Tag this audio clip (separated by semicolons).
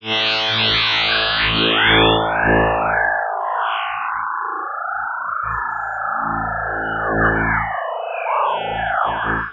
abstract; metasynth; synthesizer